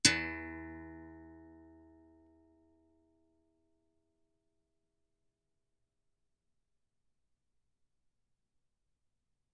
A berimbau note. No effects, no normalization, just recorded and trimmed. See another sounds in this pack.